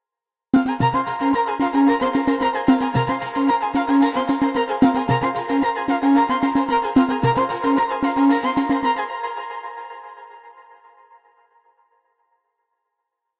video game 6
Trancy repetitive section of track.
repetitive, loop, non-sample, epic, free, original, music, griffin, track, electronic, trance, tune, danny, tv